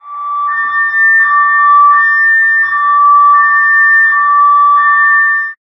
A car's alarm